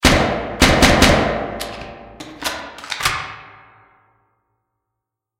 AR-15 - firing and reload sequence with reverb
Audacity 3.2 now has realtime VST effects! I decided to mess around with some Calf Studio effects and made this AR-15 firing and reloading sequence.
Sound Effects by One Shot
Or:
Additional Sound Effects by One Shot
firing, gun, military, reload, rifle, shoot, shooting, shot, weapon